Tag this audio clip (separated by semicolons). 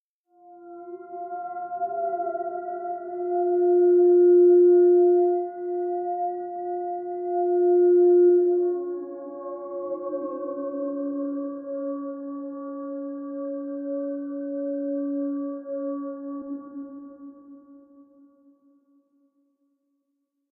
ambience; ambient; atmosphere; background; background-sound; cinematic; dark; deep; drama; dramatic; drone; film; hollywood; horror; mood; movie; music; pad; scary; sci-fi; soundscape; space; spooky; suspense; thrill; thriller; trailer